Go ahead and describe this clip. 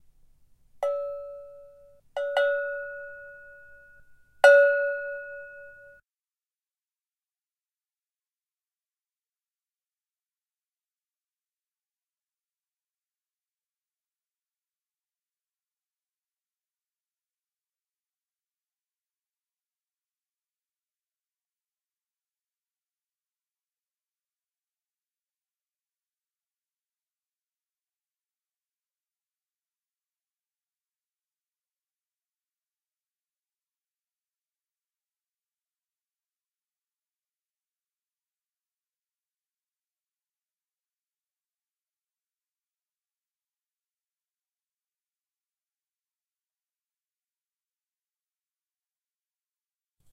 Cow Bell
acoustic, studio